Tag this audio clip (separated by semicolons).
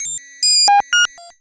computer; sound; blip